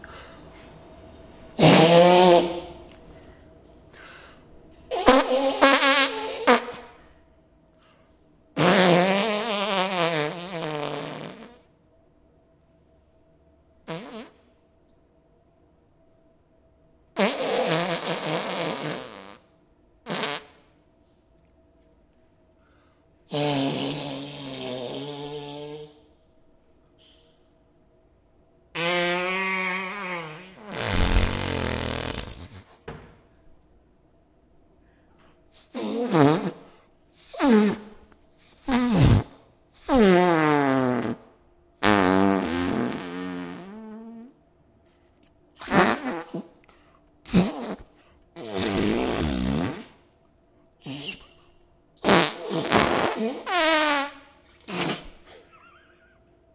several (simulated) farts with small ( toilet ) ambiance and fan in background.